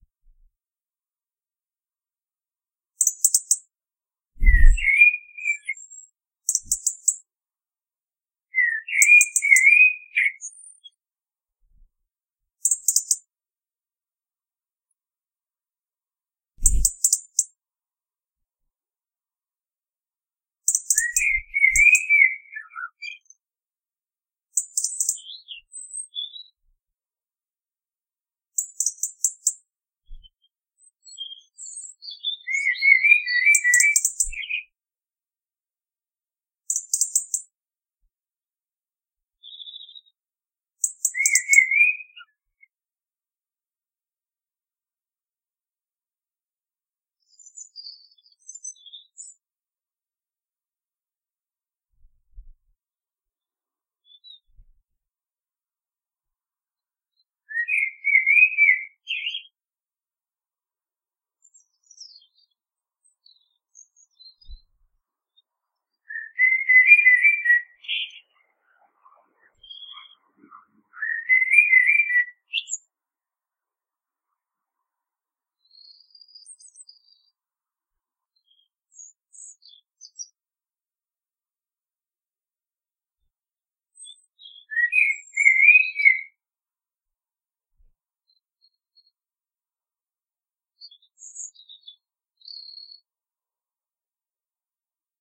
Birds chirping in the morning.
bird, nature, tweet